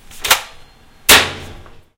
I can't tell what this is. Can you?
Various sounds from around my kitchen this one being the microwave being the oven door slammed